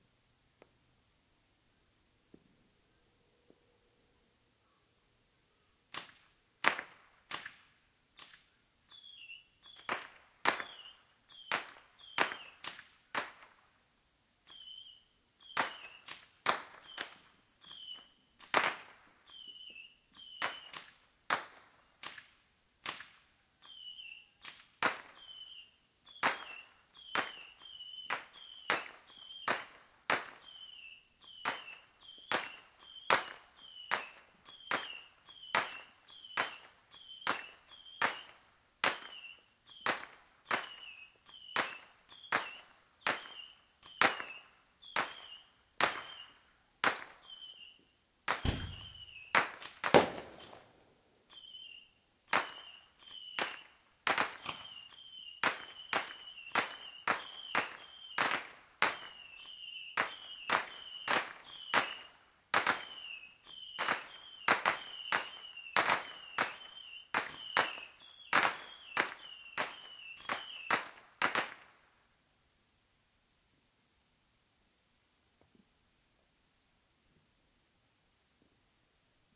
Fireworks recorded with laptop and USB microphone. Bottle rocket battery across the street after the fireworks.